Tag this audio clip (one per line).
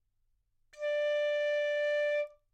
multisample
neumann-U87
single-note
D5
piccolo
good-sounds